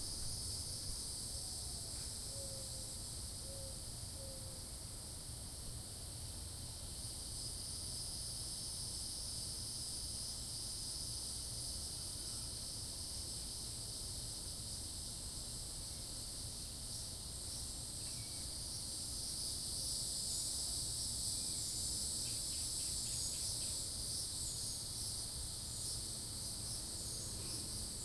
cicadas birds
Recorded using a Zoom H2. Cicadas are buzzing in the trees, along with mourning doves and other birds chirping in a wooded neighborhood area of Venice, FL. Recorded 8/7/12.
birds, cicadas, field-recording, outdoors